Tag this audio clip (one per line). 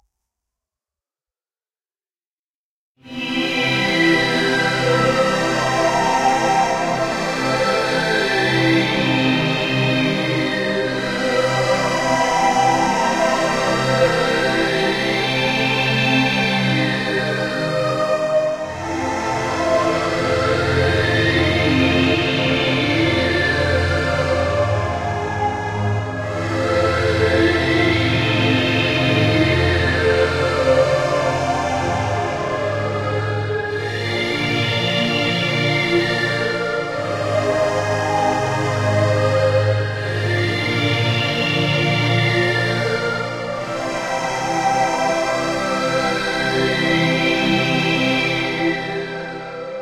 sample
Fm
orchestral
dramatic
Strings
movie
vibrant
F6
violin
theme
C7
ambience
film
cinematic
Fmaj7
loop
atmosphere
C6
Cmaj7
string-ensemble
slow
instrumental
Cm
warm
classical
music
F7
intro
orchestra